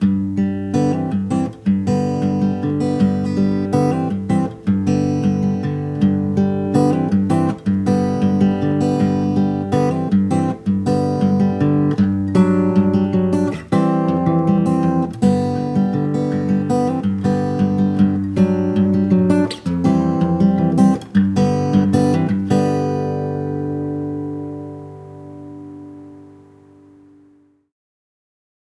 acoustic guitar interlude
a short solo acoustic interlude in G (80 bpm). folksy and to the point.
recorded with a Zoom H1 and lightly processed in Logic Pro X.